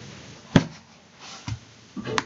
A thump
I will be using all my sounds (and some of yours) in my game: